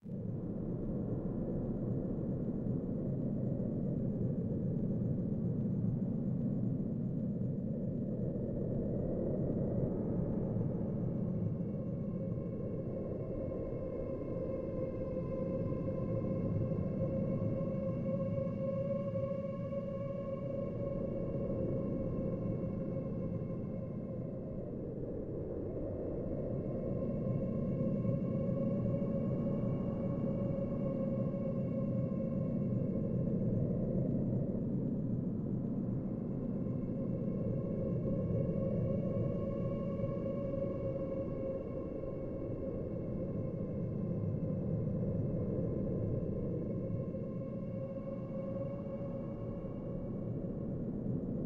Wailing Winds
Multilayer wind effect created from a Yamaha keyboard then re-edited through Vegas Pro to get the yelling effect.
wailing, banshee, Wind, cave